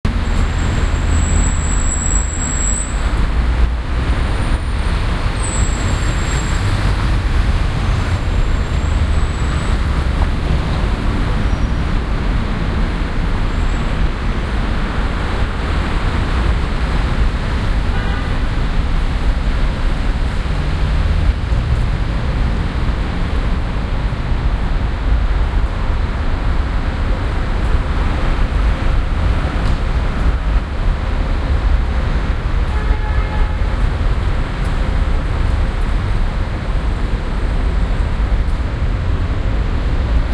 Baker Street - Bell of Marylebone Parish Church
ambiance, ambience, ambient, atmosphere, background-sound, city, field-recording, general-noise, london, soundscape